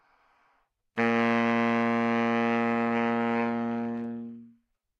Sax Baritone - C3
Part of the Good-sounds dataset of monophonic instrumental sounds.
instrument::sax_baritone
note::C
octave::3
midi note::36
good-sounds-id::5537
baritone, C3